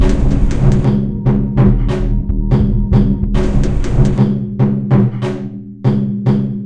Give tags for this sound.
monome
loop
rhythm
glitch-hop
recordings
experimental
undanceable

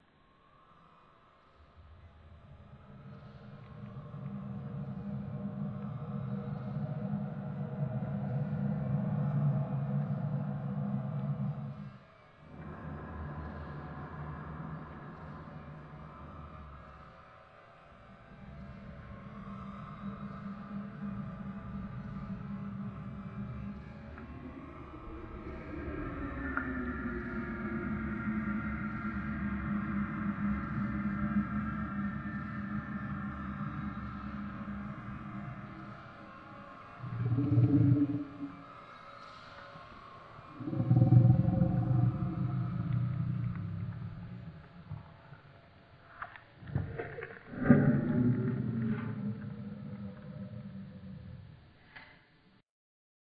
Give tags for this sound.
beast
growl
horror
monster
space

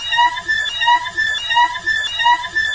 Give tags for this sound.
noisy
violin
stab
pycho
loop
disco

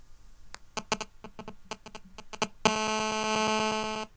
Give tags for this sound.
crackling
pop
noise
static
interference
crackles
phone
beeps
clicks
gsm
bleeps
cell
mobile

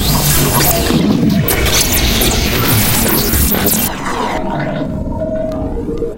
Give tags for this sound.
2-bar
field-recording

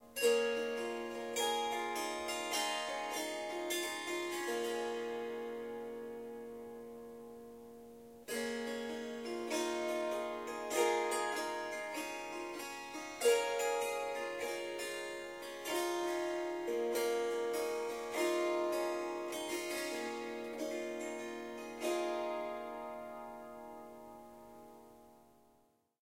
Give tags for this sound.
Strings
Harp
Melody
Melodic
Ethnic
Riff
Swarmandal
Indian
Swarsamgam
Swar-samgam
Surmandal

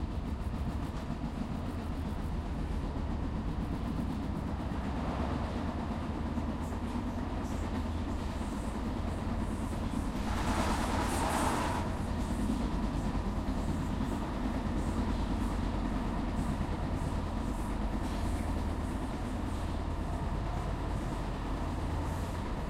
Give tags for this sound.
above-ground
field-recording
interior
light-rail
metro
moving
other-train-passes
other-train-passing
overground
subway
train
train-passing
travel
travelling
tube
underground

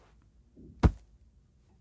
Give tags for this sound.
punch
space

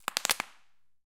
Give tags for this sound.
air-bubbles
packing-material
bubble-wrap
packaging
air-bubble
plastic
bubble-pop
popping-bubble
popping
pop
packing
popped
bubble-popping

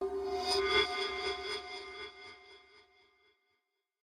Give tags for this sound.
drama
low
scrape
terror
metal
sting
dramatic
spooky
suspense
synth
scary
creepy
sci-fi
electronic
sinister
thrill

